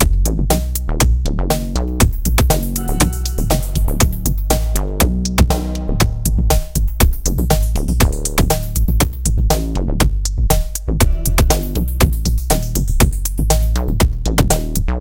A loop of a techno like drum break